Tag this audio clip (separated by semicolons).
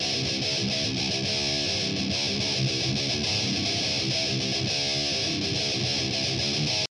groove; guitar; metal; rock; thrash